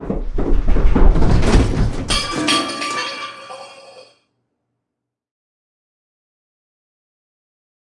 Falling down stairs v1 MIXED
Miix of my sfx to sound like someone falling down stairs.
falling, down, stairs